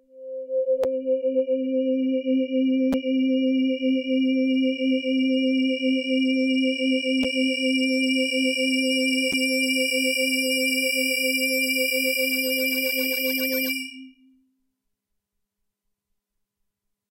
C3 FM Swell 1
Recorded with Volca FM and Microbrute, processed with DOD G10 rackmount, Digitech RP80 and Ableton